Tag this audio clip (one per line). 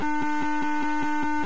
burst
noise
scanner
horn